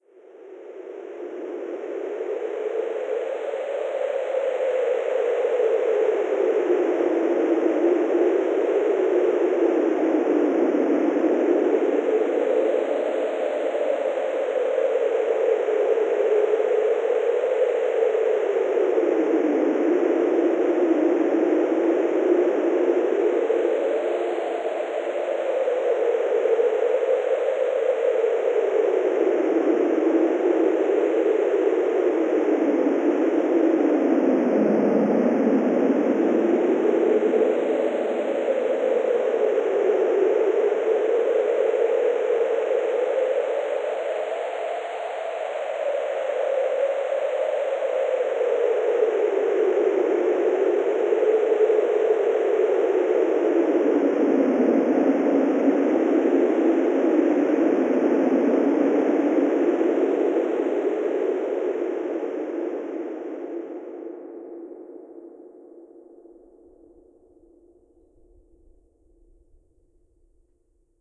Wind, Synthesized, A
Wind easily created using a synthesizer with a high-pass filter and some reverberation. I originally created this for a video game, but figured others might also find a use for it - enjoy!
An example of how you might credit is by putting this in the description/credits:
Originally created on 27th November 2016 using the "Massive" synthesizer and Cubase.
synth, synthesised, synthesized, wind, windy